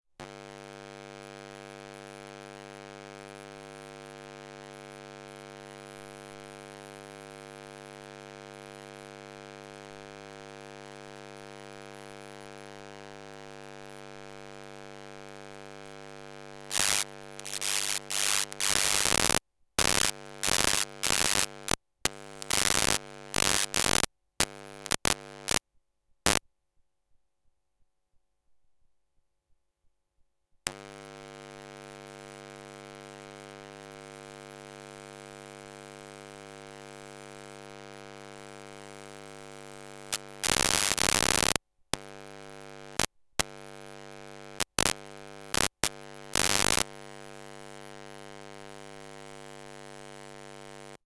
Light Switch
electronic, experimental, sound-enigma, sound-trip